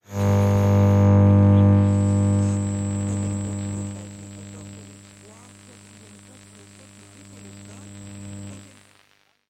some "natural" and due to hardware used radio interferences